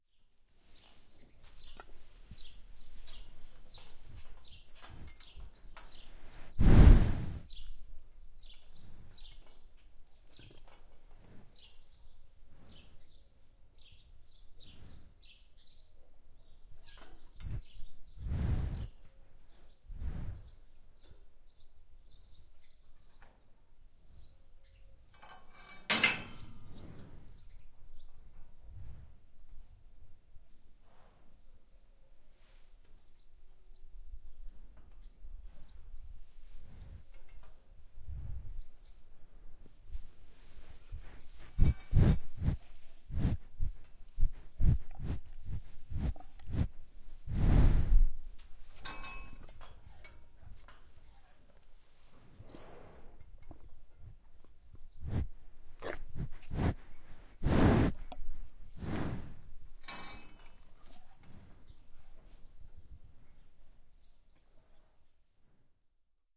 Breath of cow
Breathe of cows from a small village in France (Son, in the The Ardennes). Recorded with ZOOM H4 and AT 822.